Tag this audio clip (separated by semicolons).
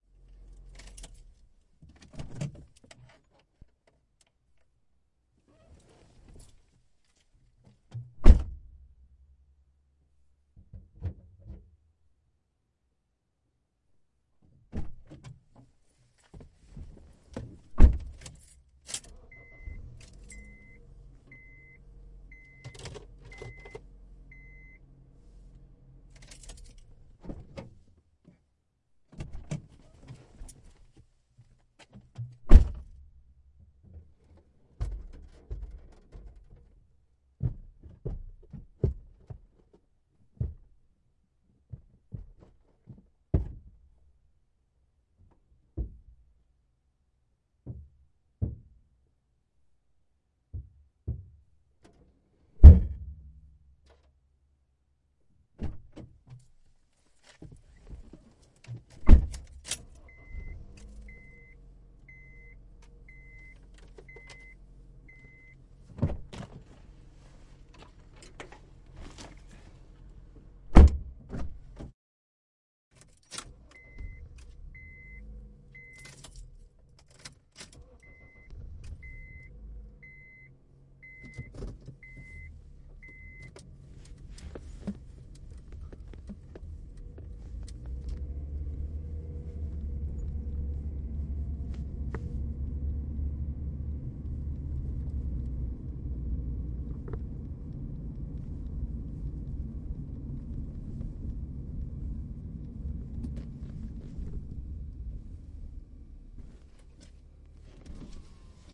car; doors; trunk